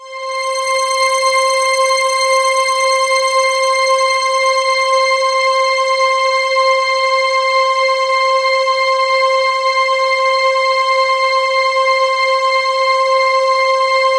Custom pad I created using TAL Sampler.
ambient, atmosphere, C5, electronic, loop, pad, sample, single-note, synth, synthesizer